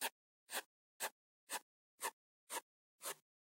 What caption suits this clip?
marker korte streep fel
marker short firm stripe
stift, pencil, draw, marker, drawing, pen